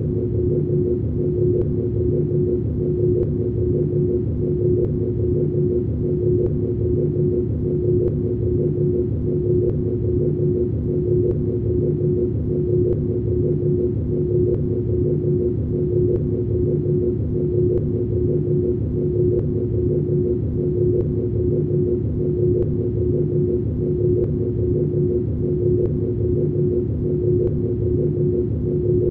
machine ultracore sound 1
Huge powerplant core, or biolab machine sound.